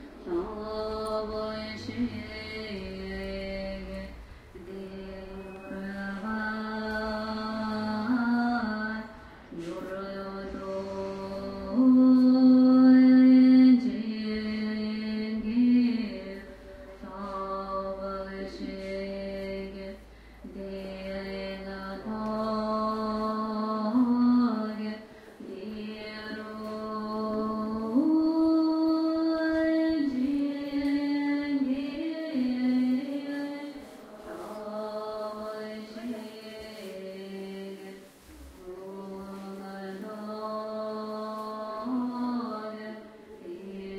Temple chanting Mongolia
A female group chant in a temple in Ulaanbaatar, Mongolia.
Buddhist, chanting, female, group, meditation, monastery, Mongolia